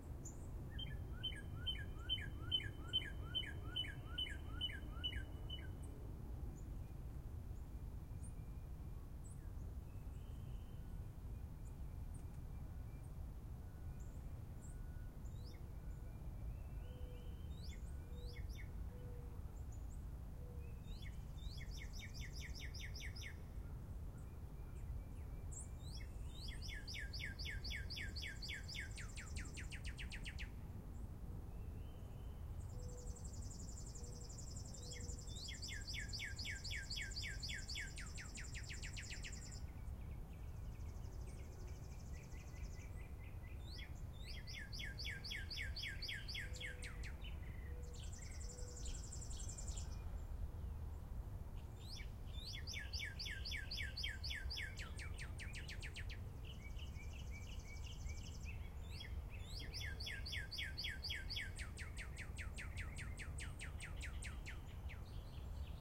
Morning Birds Cardinal short
Equipment: Tascam DR-03 on-board mics
An excerpt from a longer recording of a cardinal I made in the morning. This is the song of the cardinal.
bird, cardinal, cardinalis-cardinalis, chip, morning, sing, tweet